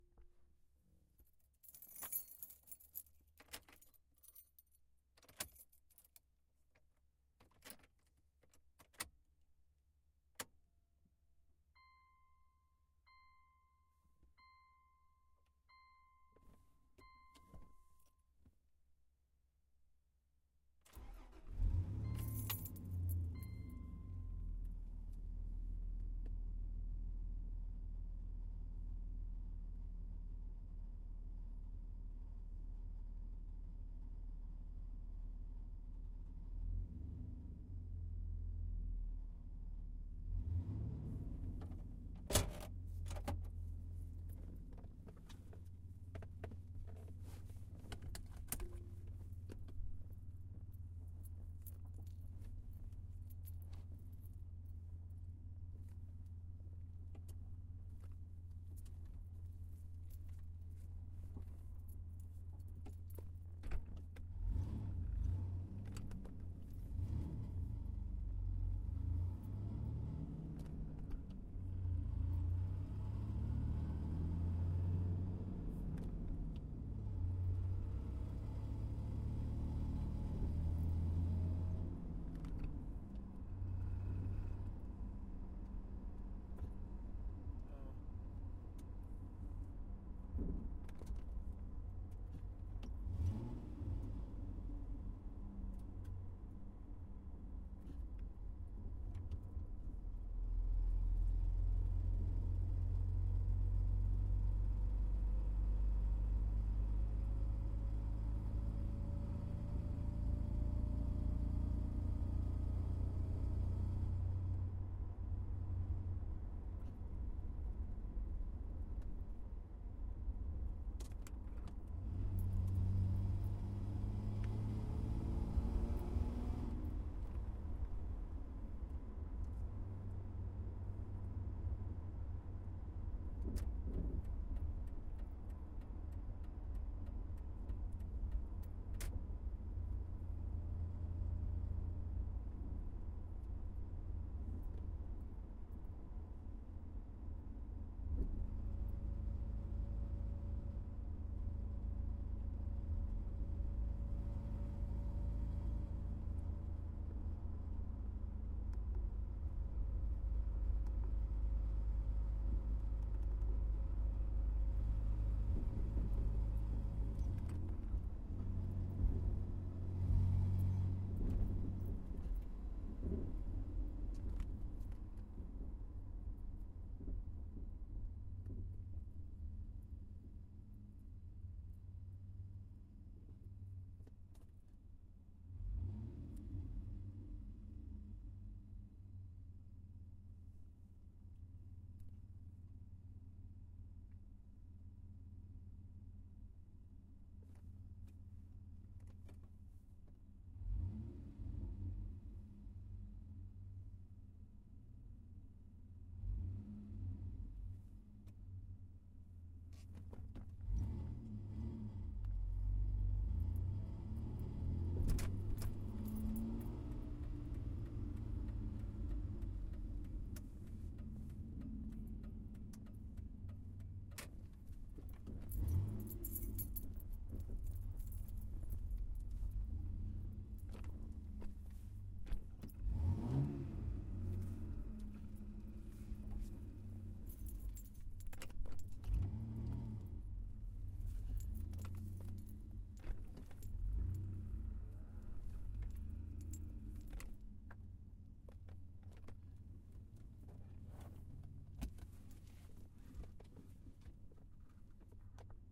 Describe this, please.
Driving - truck interior - ambience
Ambient sound in the cab of a truck with loud exhaust while driving.
driving, rumble, exhaust, cabin, drive, truck, engine, interior, loud, ambience